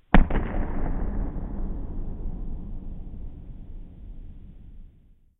Distant Explosion
I mangled the sound of someone walking on a wood floor and thought it sounded like a distant gun shot. A very nice sound. Made with Audacity.
distant, explosion, report